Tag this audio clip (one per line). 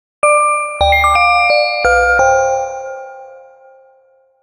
box
music